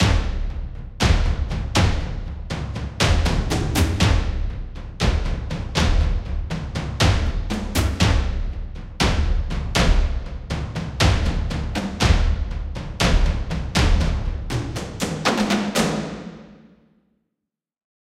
Action Percussion Ensemble (120 BPM)
Percussion for action or dramatic Films. 120 BPM. The Loop contains a warm Reverb.
Film, Percussion